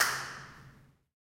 Hotel do Mar 2012-17 Large Triangular Room Clap IR
Hotel do Mar,Sesimbra, Portugal 23-Aug-2012 06:57, recorded with a Zoom H1, internal mic with standard windscreen.
Indoors ambience recording
2nd floor of the hotel. In this area the corridor widens up and branches like a Y creating an atrium space which is almost triangular in shape where the 3 corridors cross. The walls are naked painted concrete with a few scatered tile artworks. The floor is tilled.
This corridor/atrium has an interesting reverb.
I recorded two claps here with the intention of using to extract the impulse response of this space.
This is the second clap.
I believe the recording here is a bit noisy, but the claps should still be useable for IR response.
I applied Audacity's FFT filter to remove low freq rumble.
building, clap, hotel, impulse-response, indoors, inside, IR, Portugal, room, room-reverb, Sesimbra, space